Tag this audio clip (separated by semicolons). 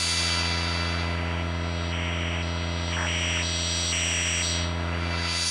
radio; interferences